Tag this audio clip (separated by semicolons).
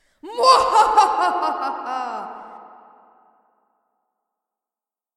laugh; evil; laughing; female; cackle; woman; laughter; girl